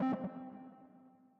Sound effect generated through synth, layering, editing.
soundeffect, effect, fx, sfx, digital, abstract, lo-fi, sci-fi, GUI, sound-design